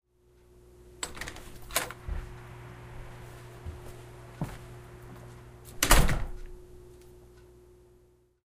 open door knob, step out, close door
Open the door with a somewhat rattly knob, step out, and close the door behind you.
Hear all of my packs here.
close, door, doorknob, knob, open, shut, slam